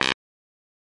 Synth Bass 010
A collection of Samples, sampled from the Nord Lead.
bass, lead, nord, synth